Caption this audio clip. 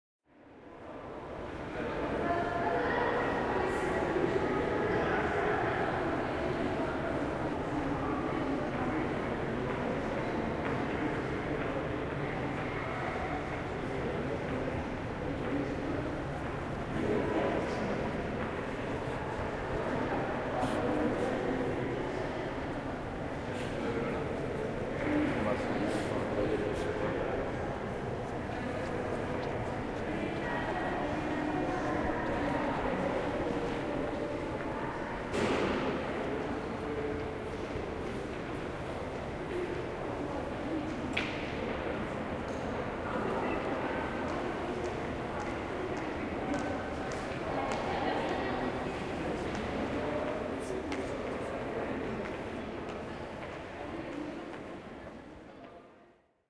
British Museum ambience 3
The ambience of the large spaces of the British Museum in London. There are voices and lots of natural reverb due to the vast size and hard surfaces. There is also a general background noise from ventilation and heating systems. Minidisc recording May 2008.
ambience, atmosphere, british-museum, field-recording, museum, voices